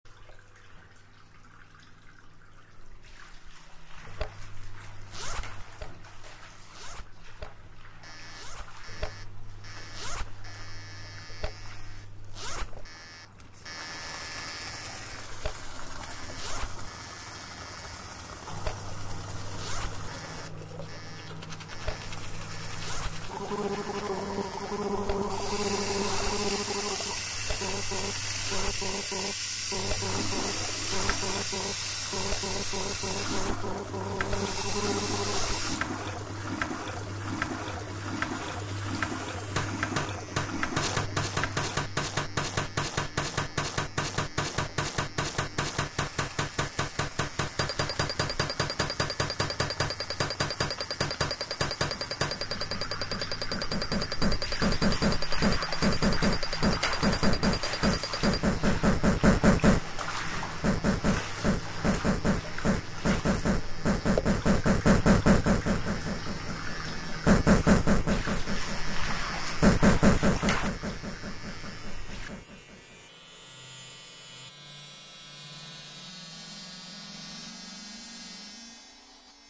A sound track made up completely of sounds recorded in my bathroom and edited in Ambleton live.
bathroom, experimental